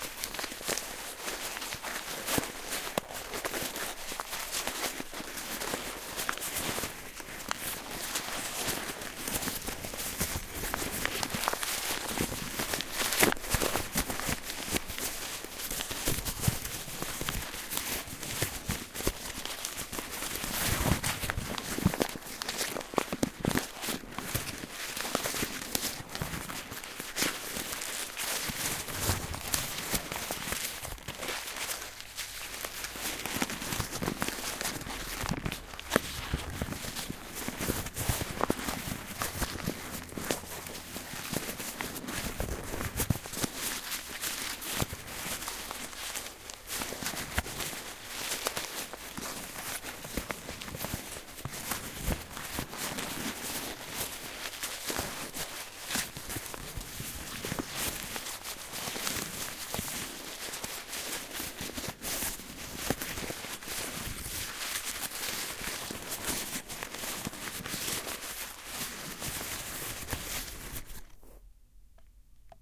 Paper Crinkle
Ambient scrunching of paper. Stereo Tascam DR-05
asmr, crinkle, crumple, crush, paper, stereo